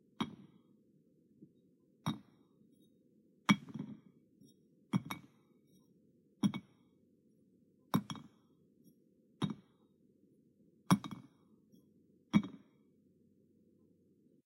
Object falling on tiles
Various sounds of a small object landing on a ceramic surface
ceramic, impact, object, foley